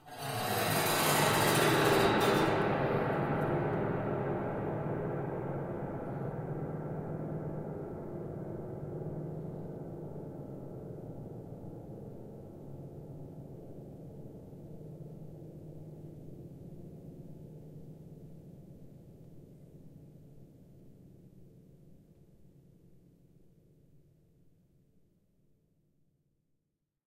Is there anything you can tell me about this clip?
stroked a whisk over the piano strings